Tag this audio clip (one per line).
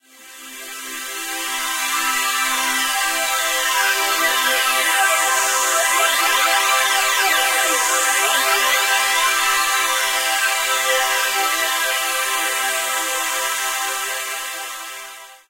Evolving
Synth
HP-Filter
Pad